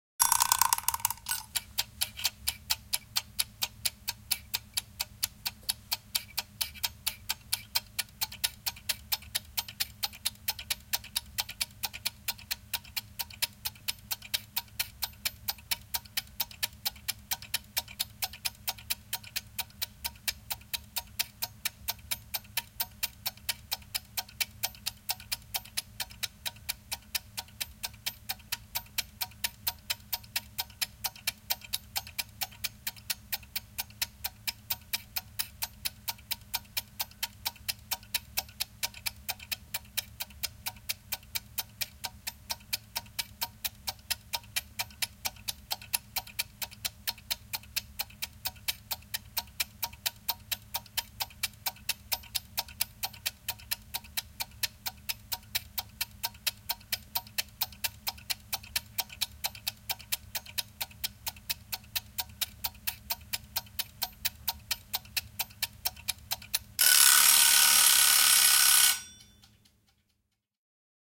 Munakello, veto, tikitys, soitto / Egg timer, wind up, ticking, ringing, a close sound (Junghans)
Mekaaninen munakello, vedetään käyntiin vieteristä, käyntiä, soitto. Lähiääni. (Junghans).
Paikka/Place: Suomi / Finland / Nummela
Aika/Date: 23.05.1992
Field-recording, Mechanical